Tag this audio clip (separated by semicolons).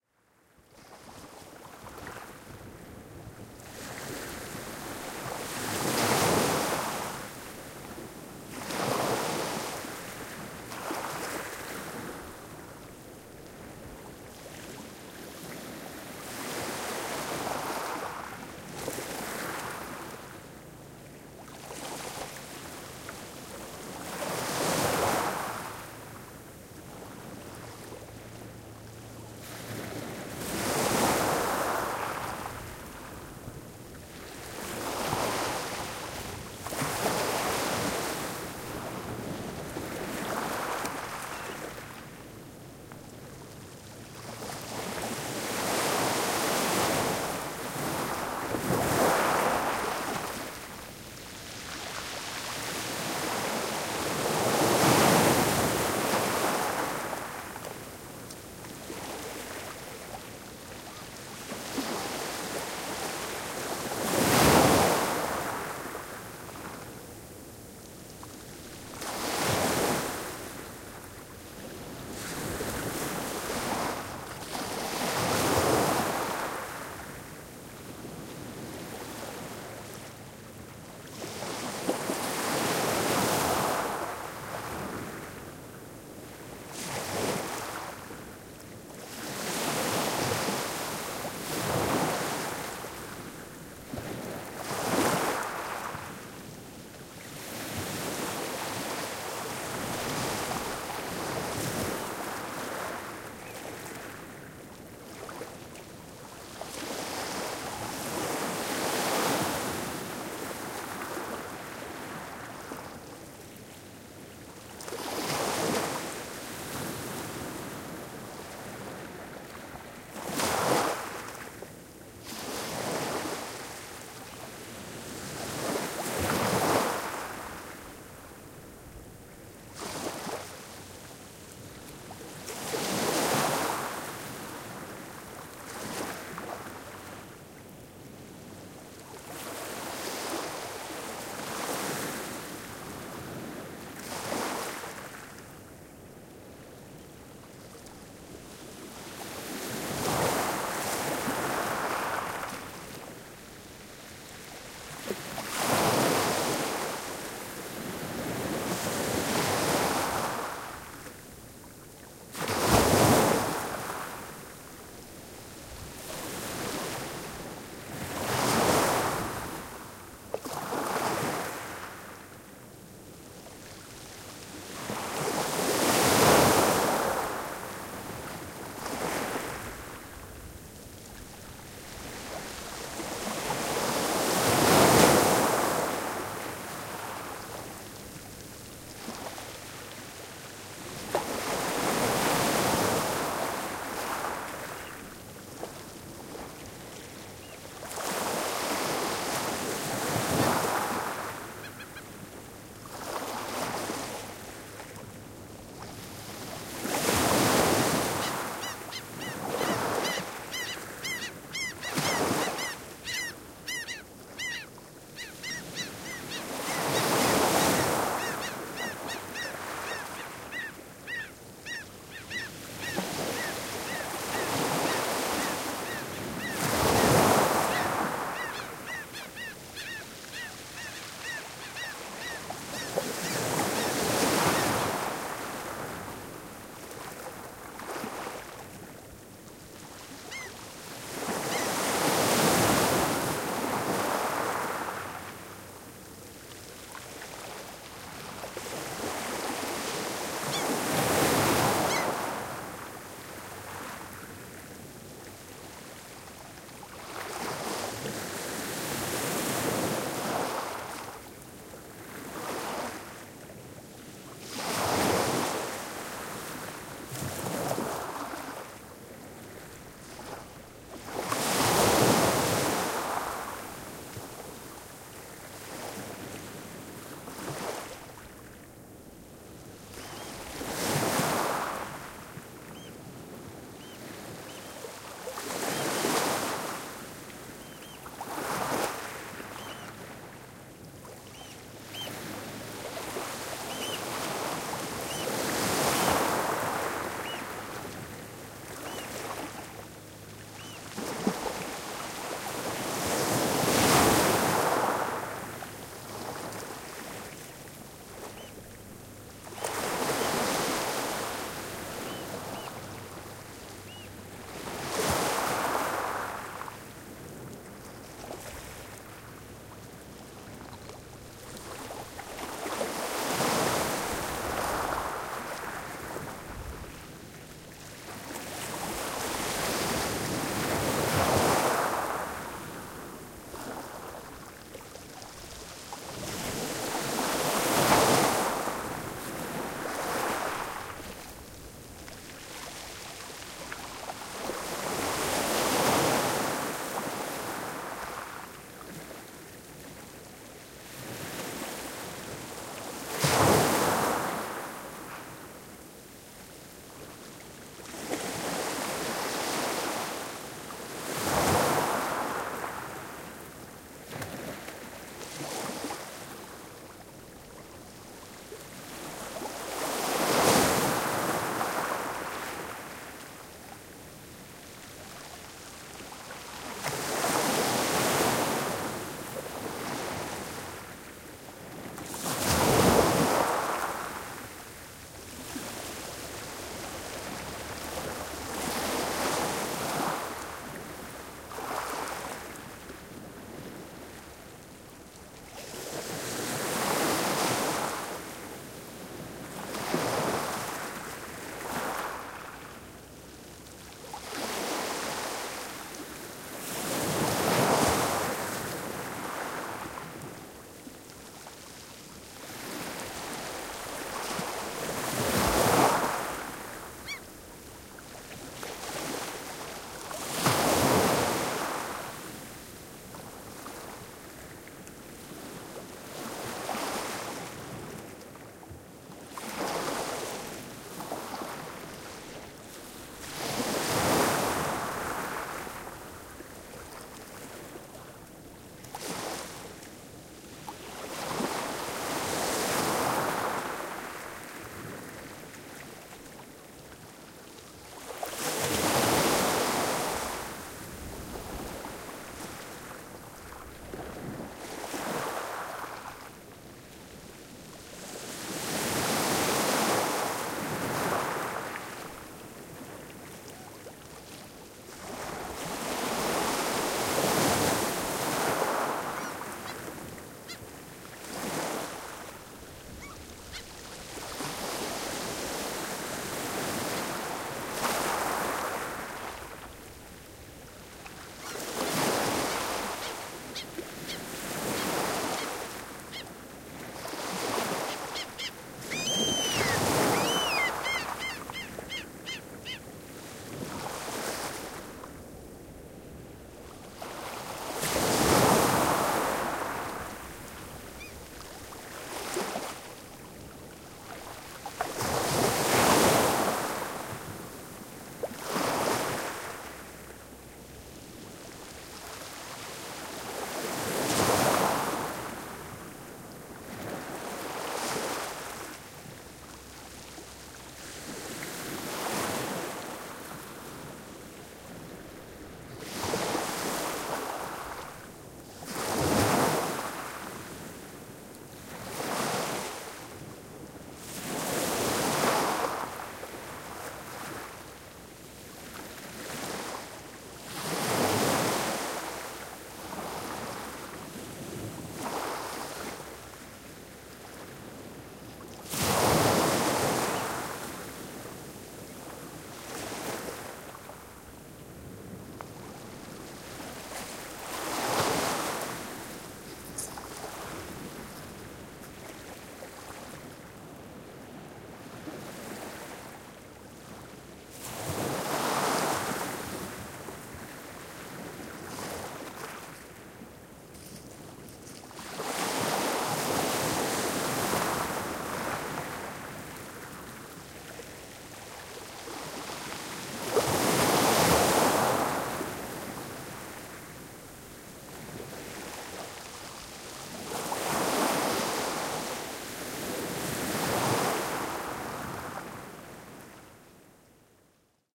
shore; coast; beach; stereo; wave; sea; bird; birdsong; field-recording; seaside; arctic-tern; birds; silence; waves; sand; ocean; nature; line-audio; wind; zoom; surf; ambience; shetland-islands; relax; water; wildlife; coastal